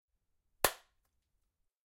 Foley high five
Panska, CZ, Czech